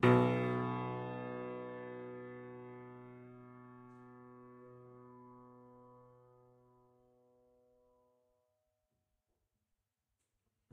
Tiny little piano bits of piano recordings